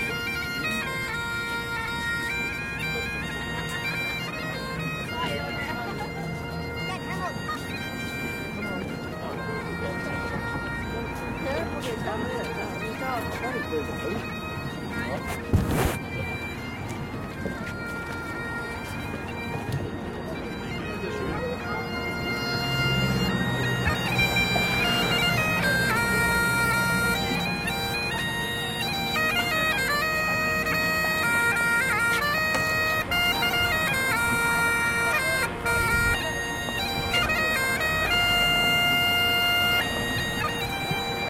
130216 - AMB EXT - piperman on westminster Bridge rd
Recording made on 16th feb 2013, with Zoom H4n X/y 120º integrated mics.
Hi-pass filtered @ 80Hz. No more processing
Piperman @ westminster bridge.
ambience, bagpipe, london, people, piper, traffic